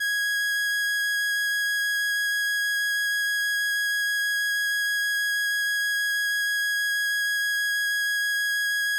Transistor Organ Violin - G#6
Sample of an old combo organ set to its "Violin" setting.
Recorded with a DI-Box and a RME Babyface using Cubase.
Have fun!
analogue, transistor-organ, vibrato, 70s, string-emulation, electric-organ, electronic-organ, raw, analog, strings, vintage, combo-organ, sample